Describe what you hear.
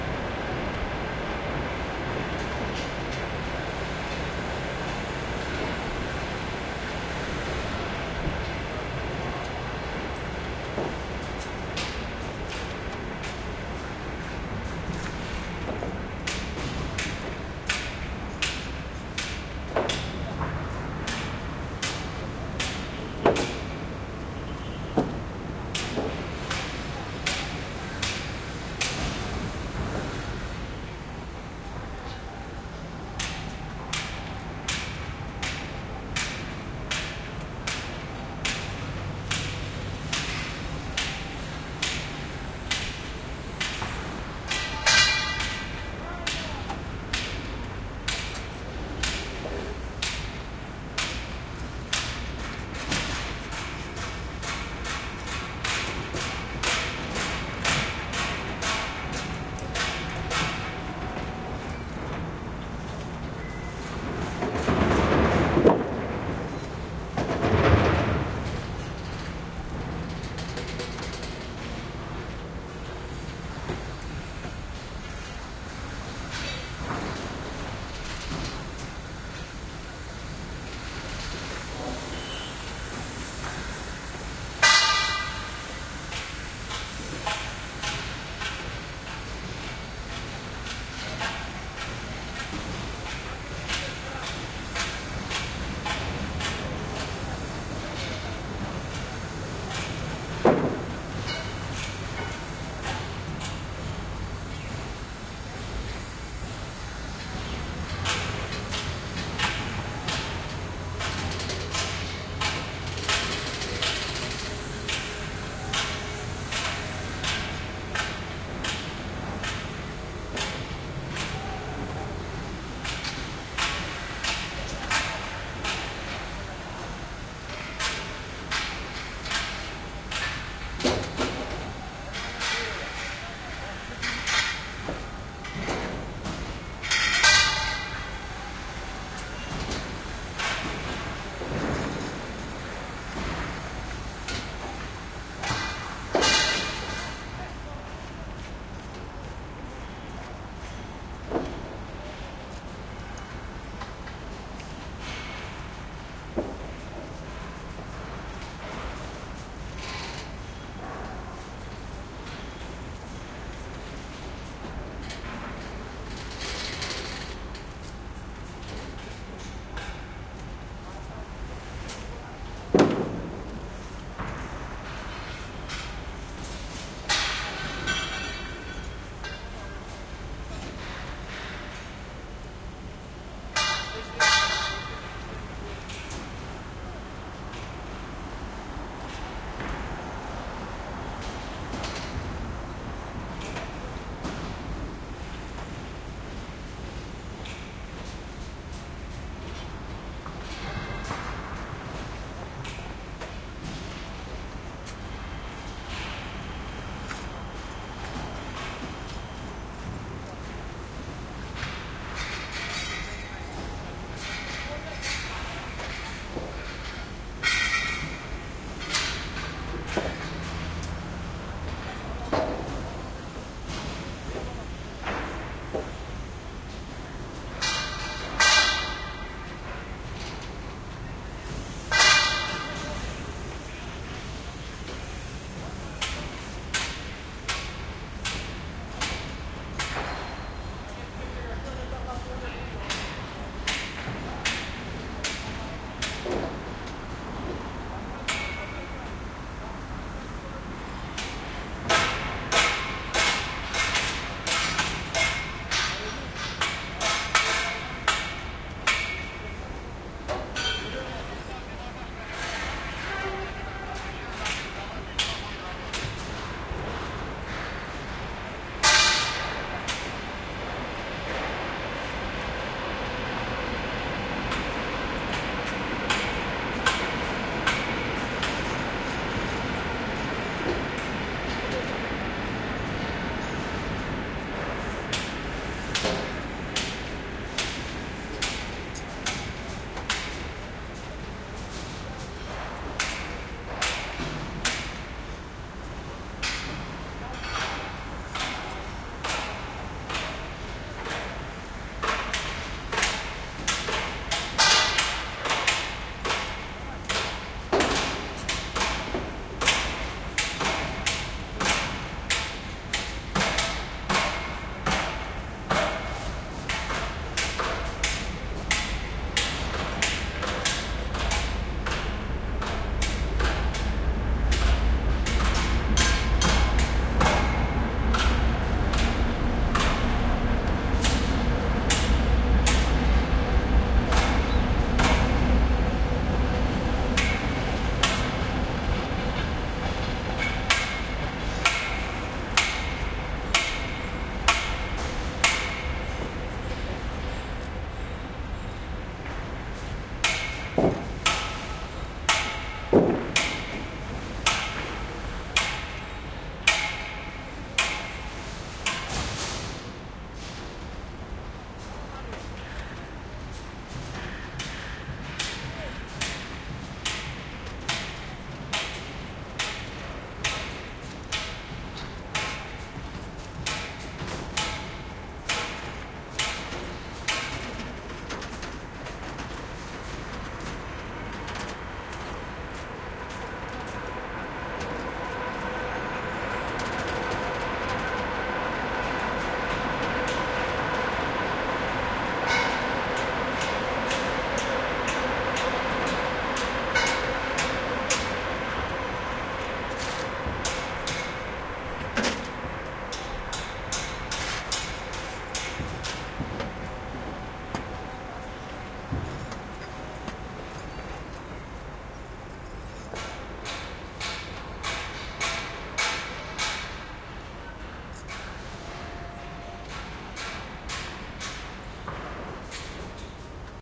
Moscow construction site amb (stereo MS decoded)
Evening ambience at the huge construction site in Moscow, Russia.
MS stereo recording made with Sennheiser MKH-418S & Sound Devices 788T - decoded to plain stereo.
ambience, build, builders, building, city, constructing, construction, construction-site, drilling, hammer, Moscow, noise, Russia, Russian, vehicles, work, worker, workers